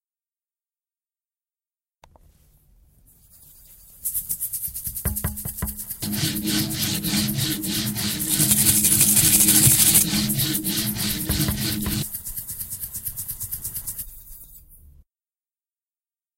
Soundscape GEMSEtoy Jules
After listening to mySounds from our partner school Jules made a selection to create a Soundscape